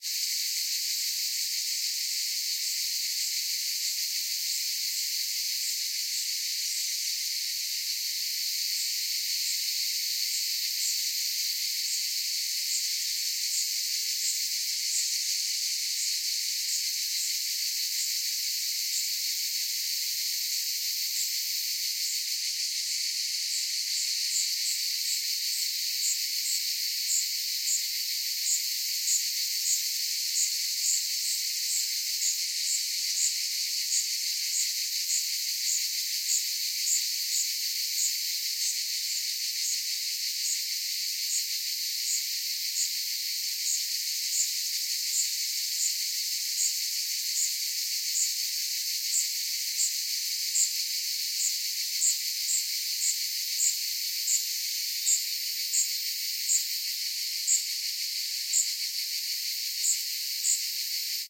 Cicadas (wide)
Recorded with a Zoom H2n via it's XY configuration. Afterwards the ambient noise surrounding the cicadas was removed. A great timing, led to a wide arrangement of cicadas perfectly balanced at right and left.
forest, wide, field-recording, cicadas